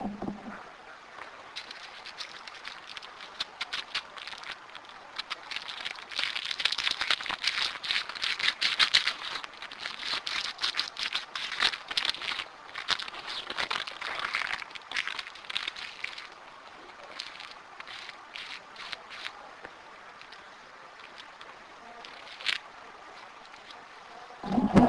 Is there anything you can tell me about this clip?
Papel de Porro
Field recording of rolling paper noise
field, noises, paper, recordings, rolling